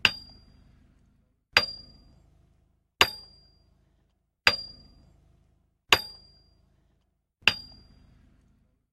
Metal Hammer on Metal
Hitting a metal hammer on a metal big nail thing, I fail to figure out the correct word for the tool being hit here.